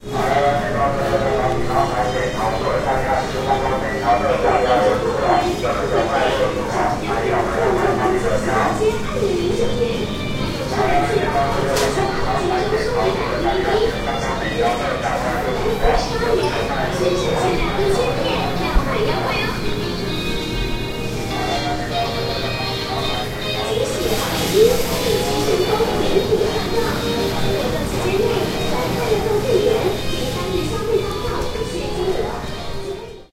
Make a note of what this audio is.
Recorded with H2 in a Geant hypermart... One of the noisiest places I know. Loud electronic hawking & muzak loops to inspire consumption. Raw.
taiwan, field-recording